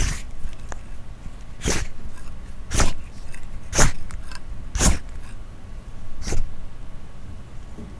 Swoosh noises being made by dragging metal quickly across surfaces